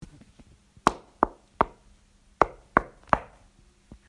Knock at the door
My sister knocking at the door.
Door, house, knocking